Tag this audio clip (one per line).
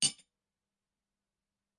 cutlery
dishes
Falling
fork
Hard
Hit
hits
Knife
knive
spoon